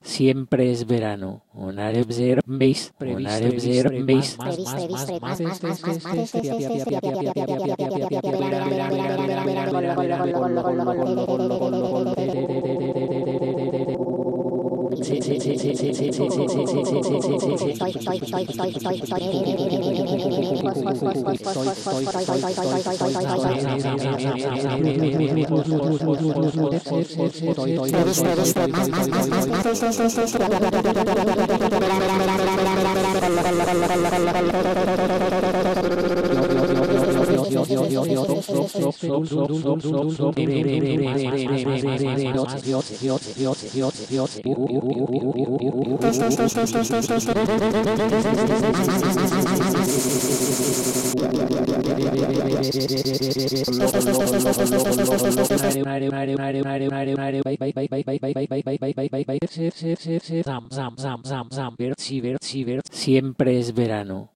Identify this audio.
05-rapping.choral
experimental, male, mix, random, rapping, rhythm, spanish, syllable, voice